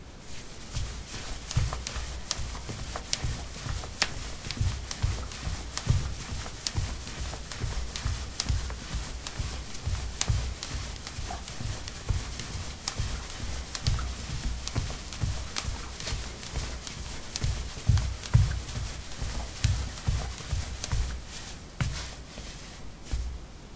ceramic 02c socks run
Running on ceramic tiles: socks. Recorded with a ZOOM H2 in a bathroom of a house, normalized with Audacity.
footstep, footsteps, steps